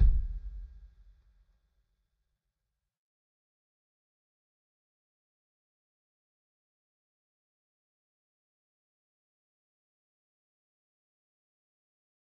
Kick Of God Bed 012
drum, god, home, kick, kit, pack, record, trash